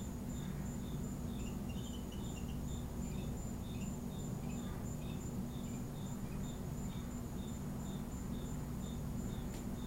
Strange "warbling" noise made by a bug or something on the patio.